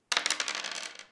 Dice Rolling on Table
A pair of dice being rolled on a wooden table
casino, dice, gambling, game, roll, rolling, table, throwing, yahtzee